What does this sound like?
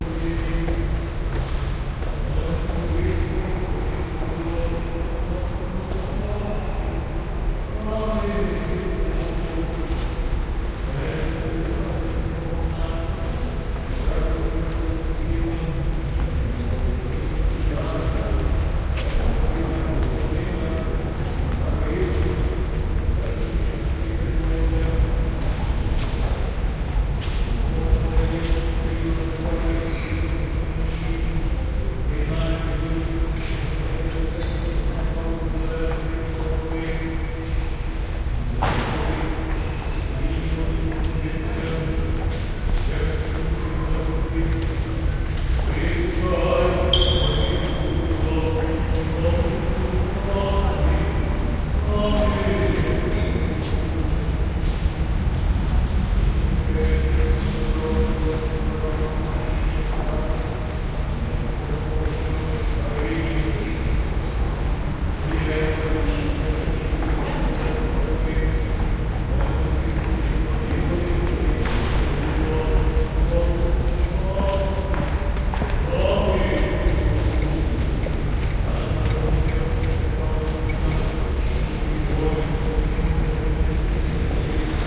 09-kiev-church-priest-blessing-squeek
Inside an orthodox church in kiev, we can hear hushed voices and a priest blessing people. This recording has a typical sneaker squeek on the stone floor.
priest, kiev, field-recording, orthodox, squeak, church, blessing